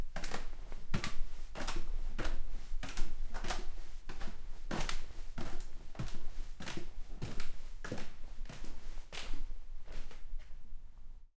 A recording of me climbing some stairs.